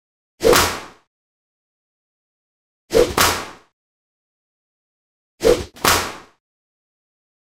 Whip Sound
attack, bruise, cowboy, foley, masochism, masochistic, model, pain, pain-giving, pleasure, punish, punishment, rome, sadism, sadistic, skin, slave, sm, smack, torture, west, whip, wild